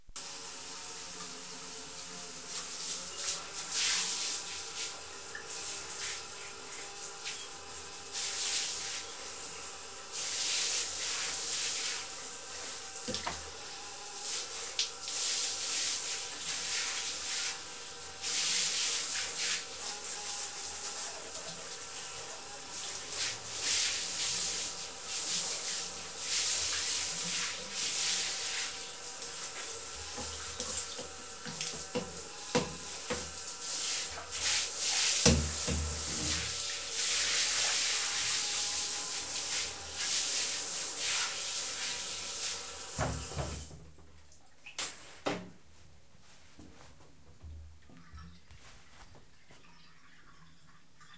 the sound of a shower recorded from inside the bathroom, very close to the water